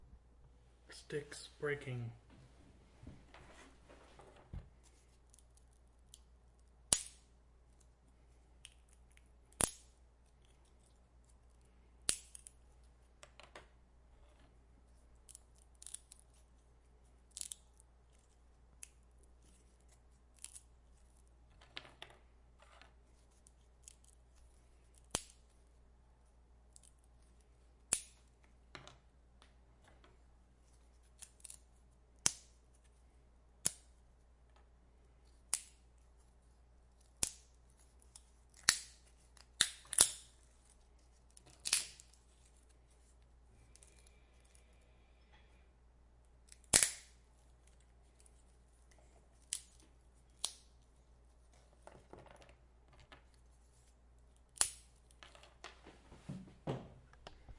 FOLEY sticks breaking
What It Is:
Breaking a single stick and then breaking several sticks.
Breaking a single stick and then breaking several sticks.